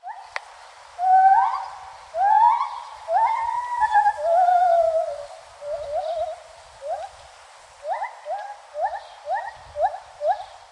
lar gibbon05
A male Lar Gibbon calling. This was recorded on a hill above the exhibit. Recorded with a Zoom H2.
monkey, field-recording, primates, zoo, jungle, ape, gibbon